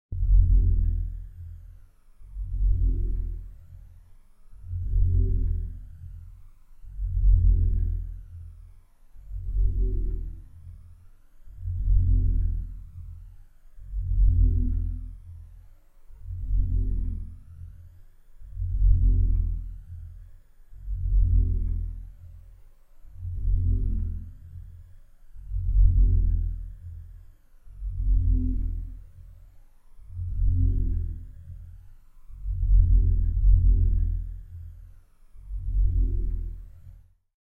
Dark industry FX
A dark droning sound, good for creating a scary ambience. Created by isolating the lower frequencies of a field recording artefact and processing with a phaser and doubling.
Original recording recorded with a Zoom H1, then processed in Edison (FL Studio's sound editing tool).